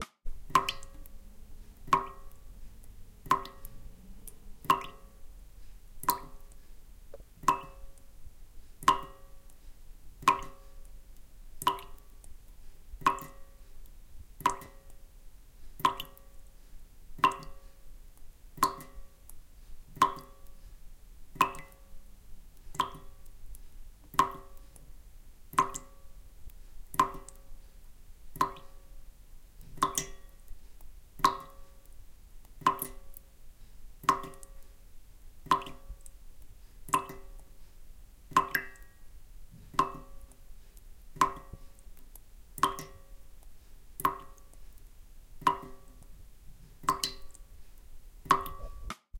Water dripping slow into metal sink V1
Water dripping slow into metal sink.
dripping, Kitchen, Sink, slow, Water